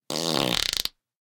The source was captured with the extremely rare and expensive Josephson C720 microphone (one of only twenty ever made) through Amek preamplification and into Pro Tools. Final edits were performed in Cool Edit Pro. We reckon we're the first people in the world to have used this priceless microphone for such an ignoble purpose! Recorded on 3rd December 2010 by Brady Leduc at Pulsworks Audio Arts.
fart, brew, wind, trump, c720, farting, bowel, brewing, passing, bottom, josephson, amek, flatulence, flatulation, embouchure, flatus, gas, noise, flatulate, rectum, farts, bathroom, breaking, rectal